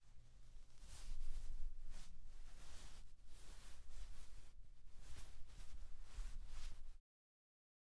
3-Movement of Sheetss
Movement, Sheets, Bed